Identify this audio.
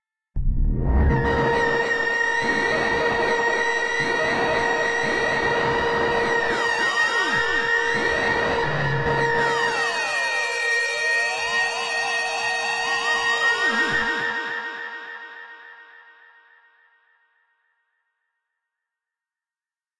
Sci Fi Growl Scream A#
A strong, aggressive electronic growl/scream.
Played on an A# note.
Created with Reaktor 6.
synth, sci-fi, digital, strange, noise, sounddesign, electronic, soundeffect, growl, robot, glitch, sfx, fx, futuristic, fiction, future, machine, science, scream, torment, effect, apocalyptic, freaky, mechanical, angry, tortured, sound-design, weird, scary, abstract